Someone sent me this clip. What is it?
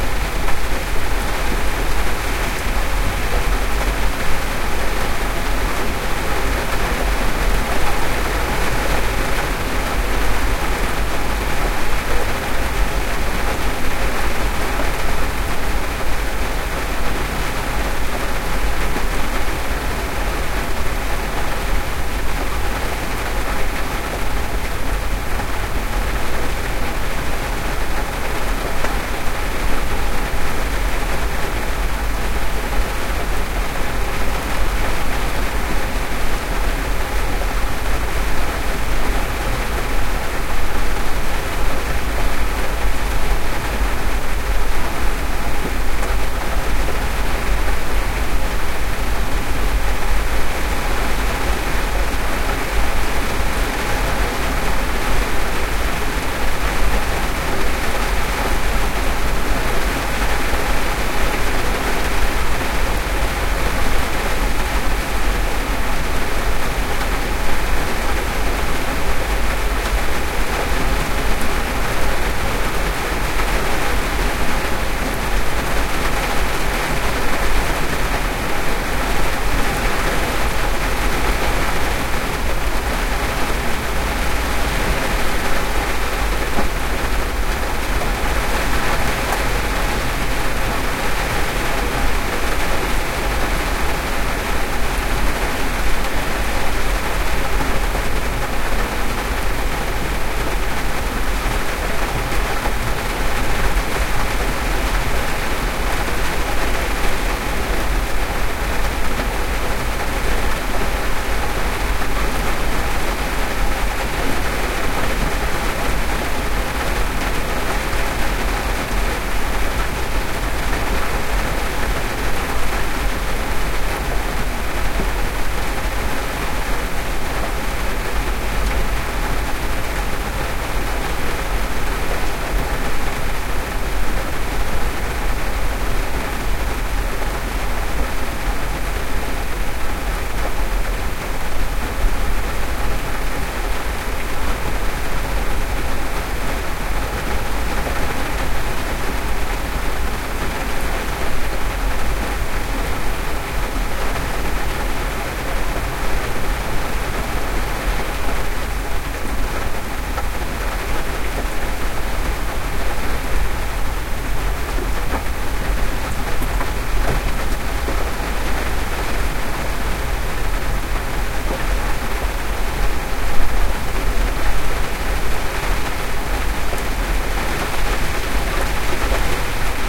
There was medium rain on Long Island, NY. I put my r-09hr in my Lexus SUV and pressed record. Edited to be a seamless loop